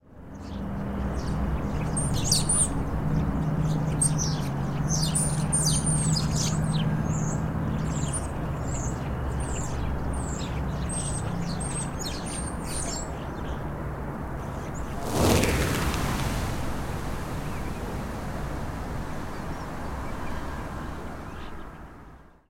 Bird Take Off
As I was leaving to do some field recording at the Newport Wetlands again, I was treated to a large group of birds feeding on the grass outside my house. I had completely forgotten my headphones but had to take a quick recording blind. It didn't turn out too badly.
I am terrible at identifying birds, something i'm going to clue up on but if anyone can suggest what they might be from their tweets, that would be amazing. Im going to look it up and I will let you know if you're right.
Again the traffic is really a nuisance around here and just after they took off a few cars drove past and I lost them.
It was such a nice treat listening back in my office-come-studio though.
I used a Sennheiser MKH416 through my SD552.
field-recording, flight, nature, birds, take-off